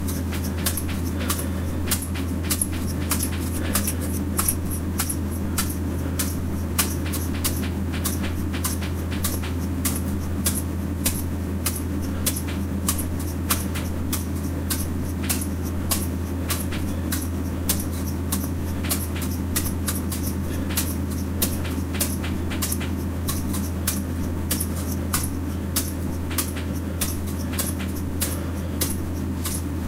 SALZ SCHÜTTLER 03.02.2015 19-37 UHR
These samples were made with my H4N or my Samsung Galaxy SII.
I used a Zoom H4N mobile recorder as hardware, as well as Audacity 2.0 as Software. The samples were taken from my surroundings. I wrote the time in the tracknames itself. Everything was recorded in Ingolstadt.
ambiance, nature, field-recording